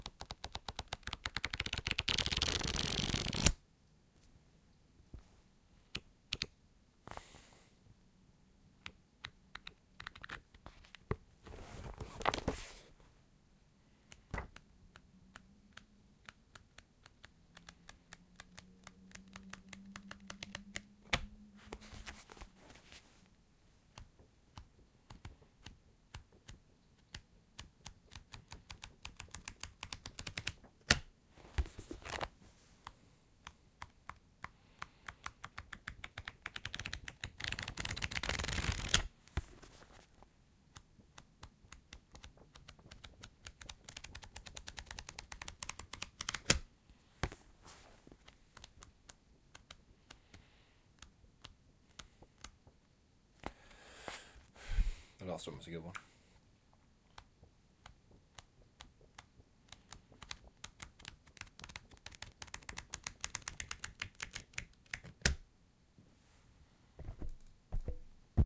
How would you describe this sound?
Thumbing through book
pages, page, paper, foley, Book, thumbing, flutter